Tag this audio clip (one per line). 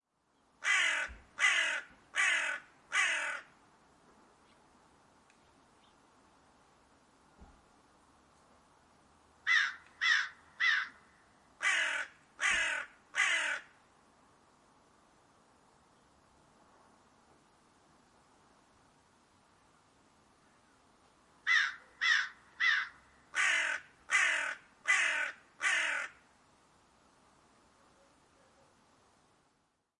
crow
caw
animals